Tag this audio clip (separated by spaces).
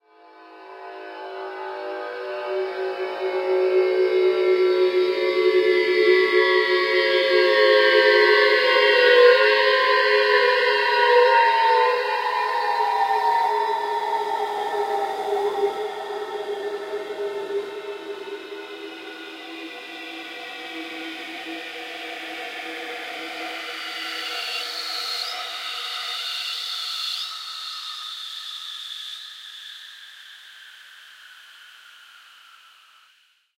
aminor descending drone metallic swish